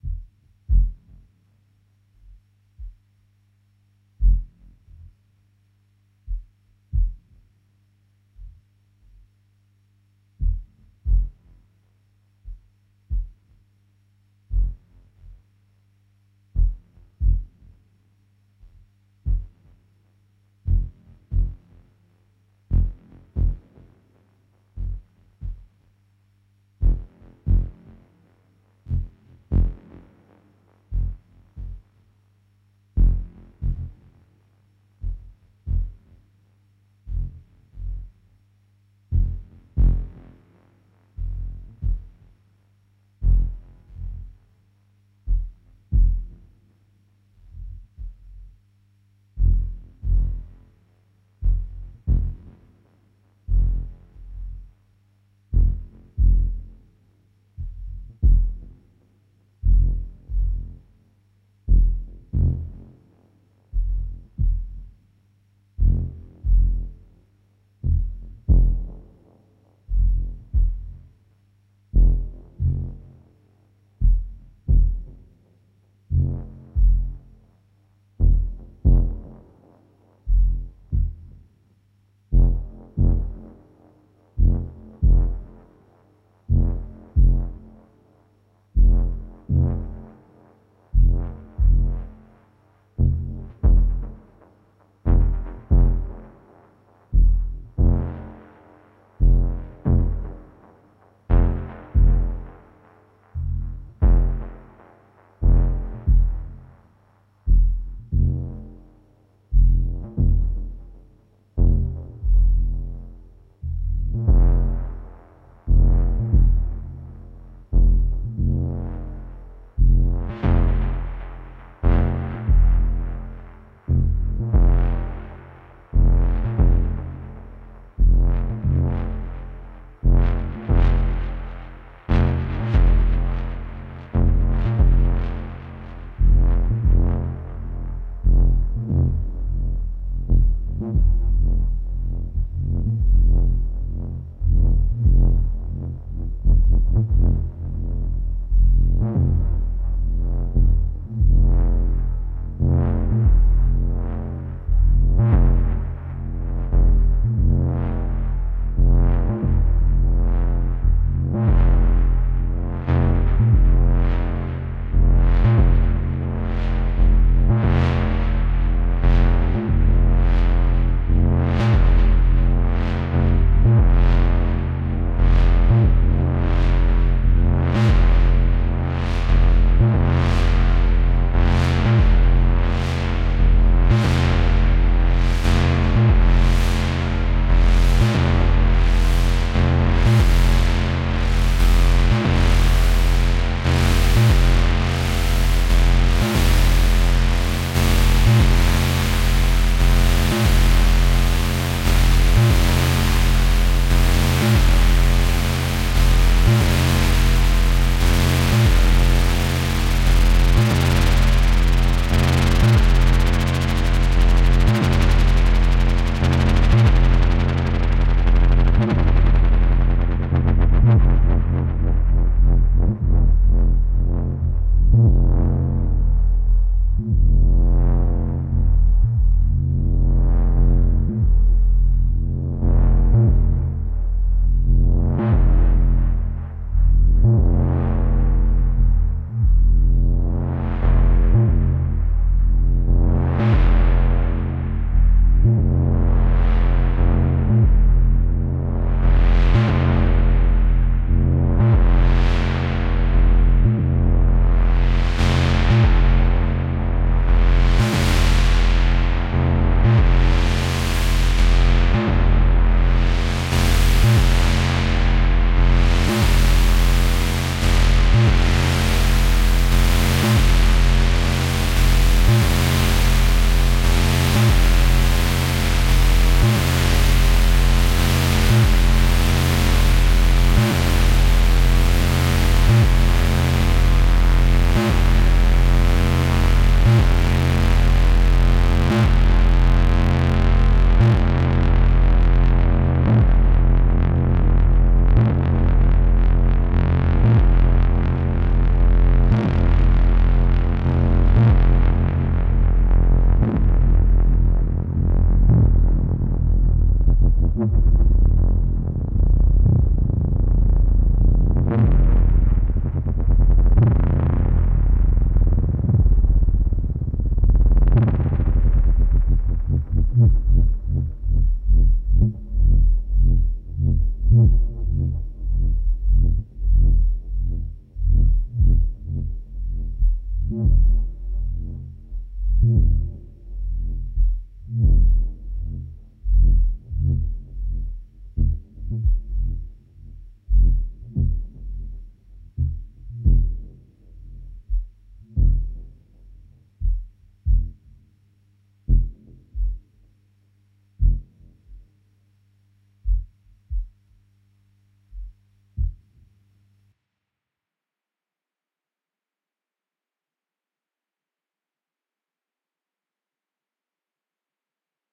Buzzin screaming drone sound i made on a a Behringer Model D analog synthesizer synced up with a TD-3 for CV input, recorded trough a Soundcraft Fx16II mixer with reverb and delay effects in Ableton Live. Some processing was done later in Adobe Audition to finalize this sound.